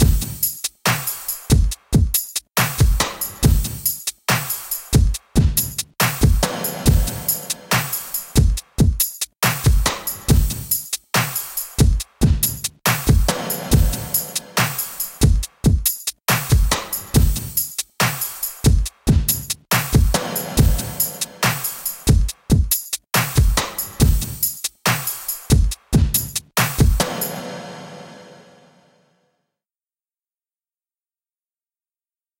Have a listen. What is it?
time night mares
dubstep beat made in reason on the redrum machine. mostly factory sounds.